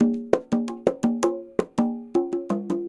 Congas 77 BPM
Just straight 1 bar congas around 77 BPM